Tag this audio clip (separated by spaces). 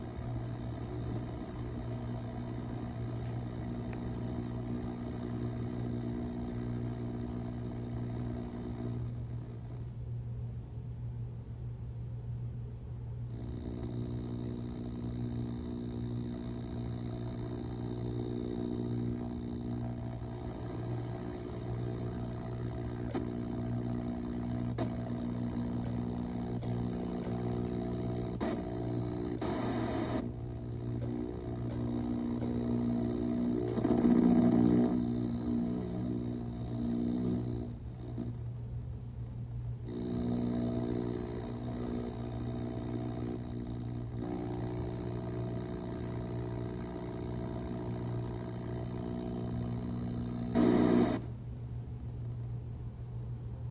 analog bending circuit CRT electronic glitch machine noise television tv